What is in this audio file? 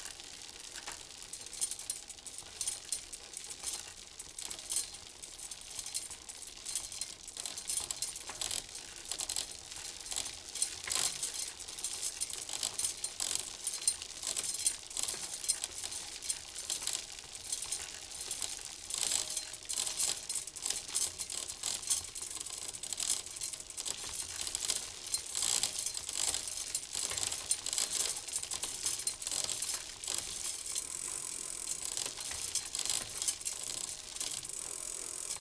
bicycle peddle fast wheel spin clicky something in spokes
bicycle, clicky, fast, peddle, spin, spokes, wheel